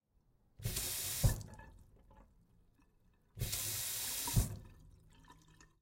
Sink; turns on and off; close
A sink being turned on and off.